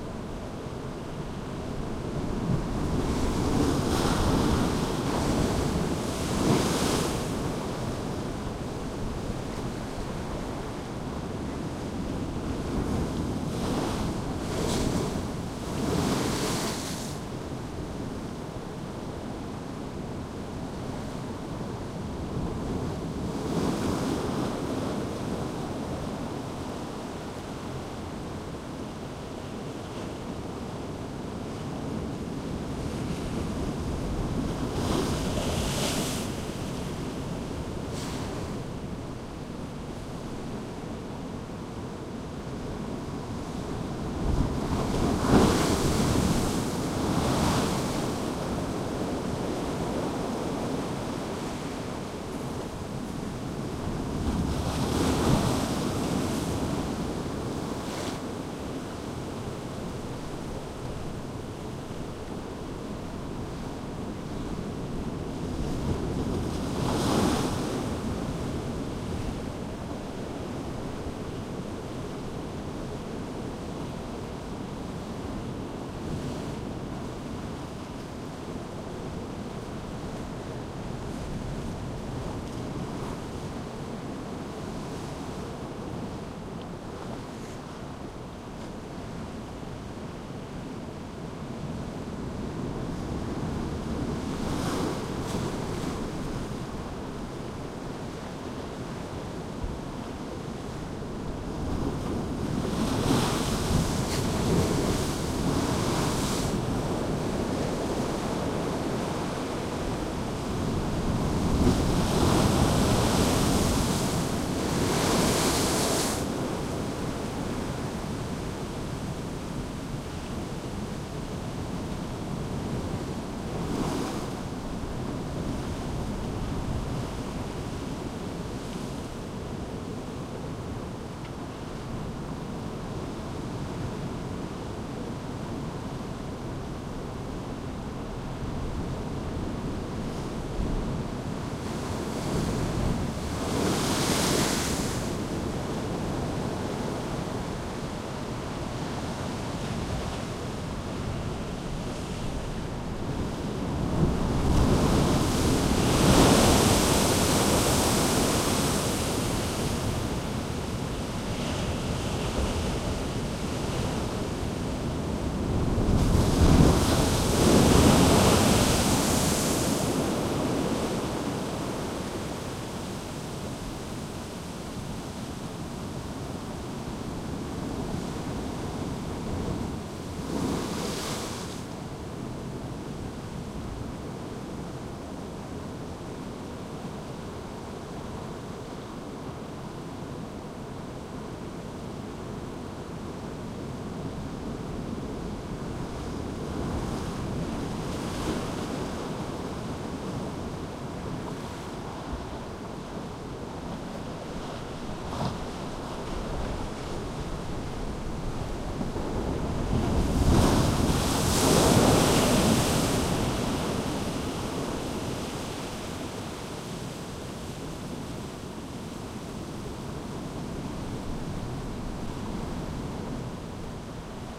waves breaking and splashing in Dyrholaei Peninsula, near Vik (Vík í Mýrdal, the southernmost village in Iceland). Shure WL183, FEL preamp, Edirol R09 recorder